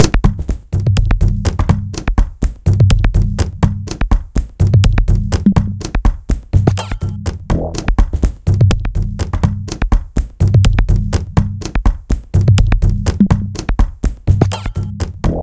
minimal tribal kinda thing.
industrial, loops, machines, minimal, techno